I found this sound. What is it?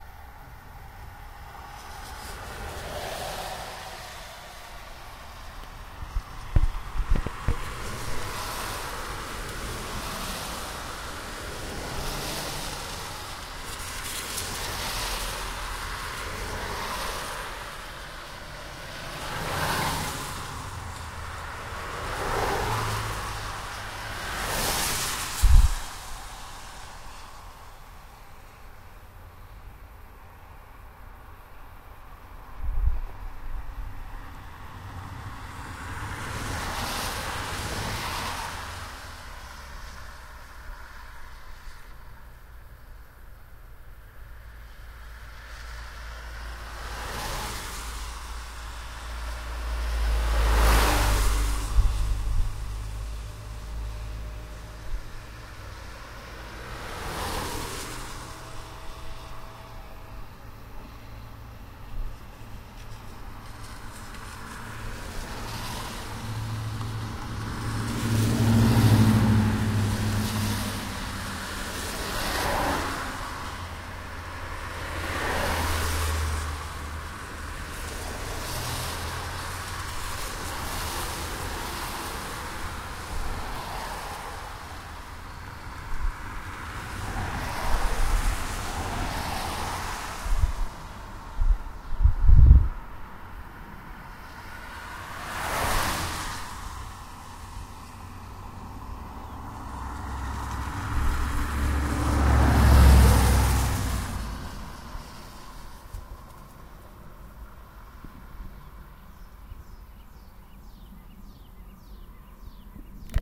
cars passing close by wet road
field recording from roadside with cars passing by from left and right.tires on a wet pavement
wetfield-recording, roadside, passing, automobiles, tires